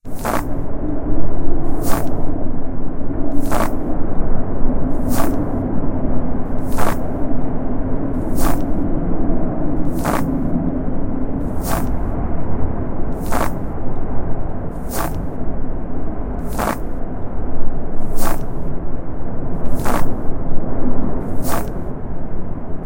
walking in snowstorm
steps, footstep, snowstorm, cold, snow, walk, walking, wind, footsteps